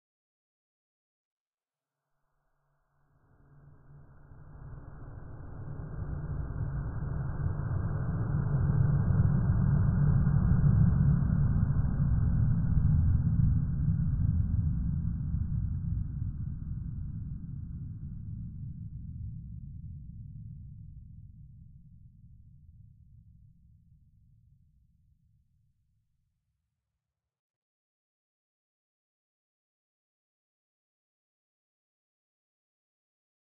Ambient Rumble
A deep rumbling sound made in Audacity. It could be used in a very large, expansive setting, like in a giant cave or space station. Would also work in a horror situation.